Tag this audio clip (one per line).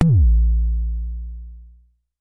bd
bassdrum
analog
kick
jomox